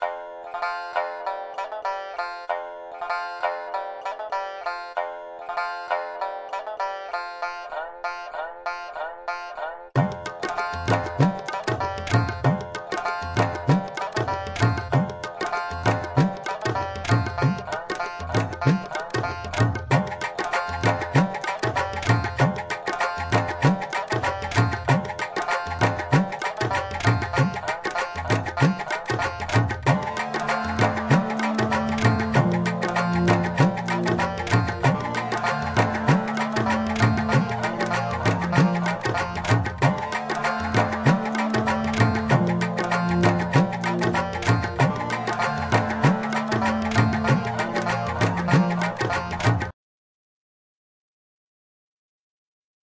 World melody
Playen in meditation and world sounds made this in Ableton
relaxing, relaxation, foreign, spiritual, ambience, meditation, world, ambient, new-age, atmosphere